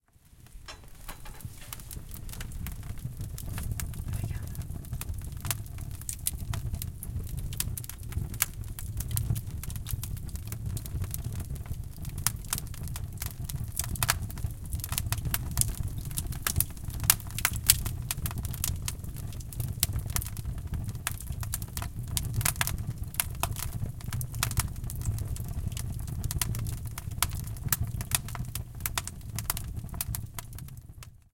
Sound of a fireplace. Sound recorded with a ZOOM H4N Pro and a Rycote Mini Wind Screen.
Son d’un feu de cheminée. Son enregistré avec un ZOOM H4N Pro et une bonnette Rycote Mini Wind Screen.